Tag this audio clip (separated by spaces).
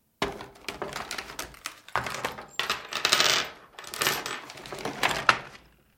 cabinet garage mechanics toolcase tools